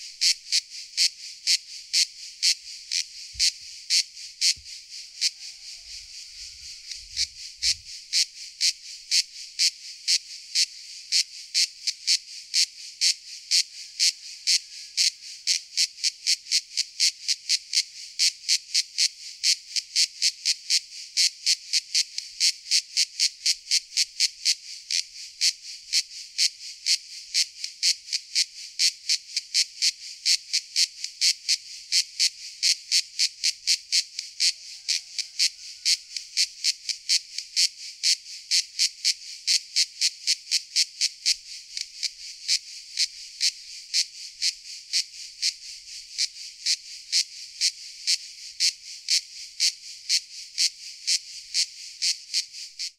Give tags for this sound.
sepurine; cicada

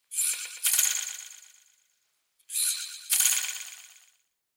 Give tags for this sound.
springs; mechanical; antique; historical; mechanism; hand-operated

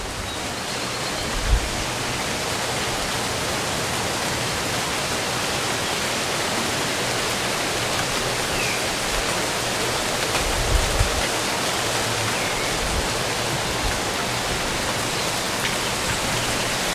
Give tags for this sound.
rain; light-rain; storm; birds; nature

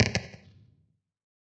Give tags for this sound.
glitch; percussion; synthesized

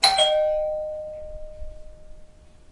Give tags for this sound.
bell; door; house

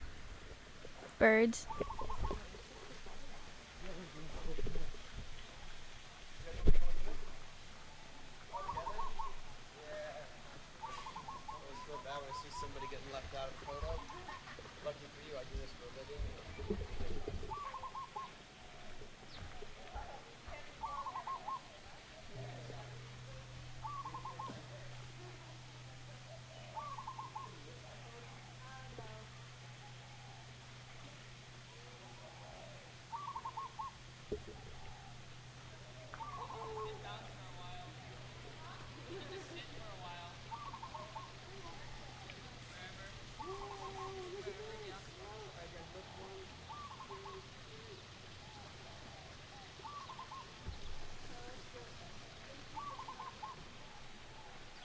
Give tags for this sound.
ambience; bell; temple; gong

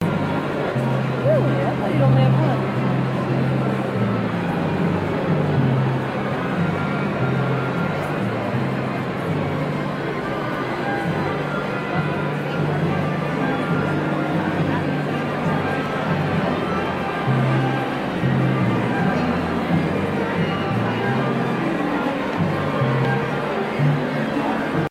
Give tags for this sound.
audience; auditorium; symphony; walla

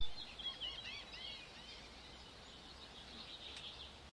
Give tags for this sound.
ambiance; field-recording; new-jersey; ocean-city; seagull; vacation

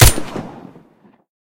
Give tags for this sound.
guns; shot; weapon

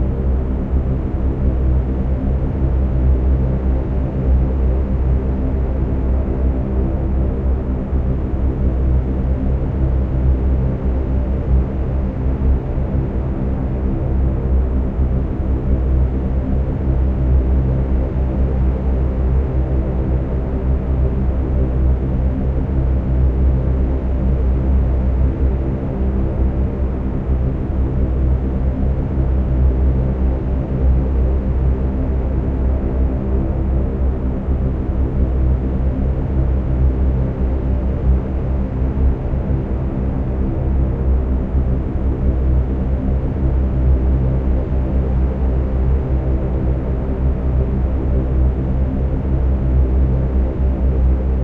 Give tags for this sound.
propeller; lancaster; b29; 21000-feet; altitude; aeroplane; drone; machines; airplane; rumble; cruising; wright-r-3350

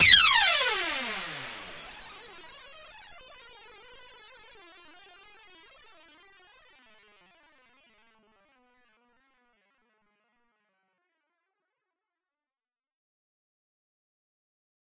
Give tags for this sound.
Nontendo; FX

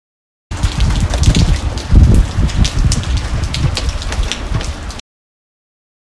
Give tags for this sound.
sound; rain

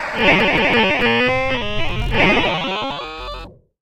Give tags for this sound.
digital; random

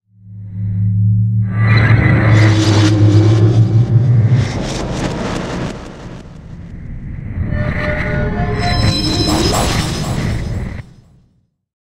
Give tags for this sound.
atmosphere; cinematic; drone; futuristic; glitch; hit; horror; impact; metal; morph; moves; noise; rise; scary; Sci-fi; stinger; transformer; transition; woosh

